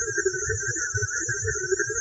generated white noise using CoolEdit. filtered for high-resonance. applied some high end noise reduction.
spacey, noise, synthetic, resonance, space, white